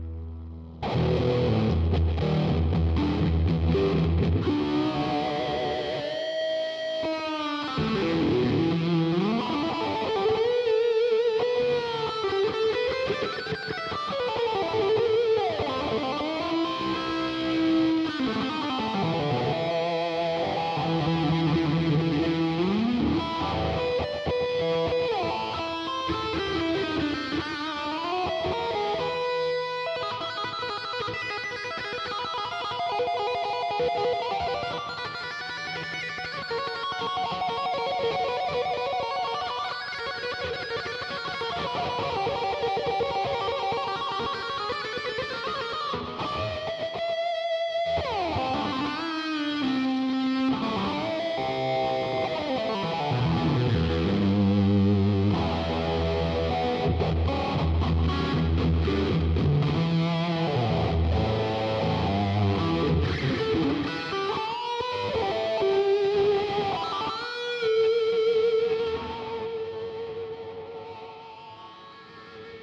Guitar Jam 3 - Drop Down (Lead to Heaven)
Guitar lead part with heavy distortion. Used Vox Tonelab LE on channel #29 ERUPT. I also used a Deluxe American Fender Stratocaster plugged into a Jamlab 1/4-USB sound card into my computer.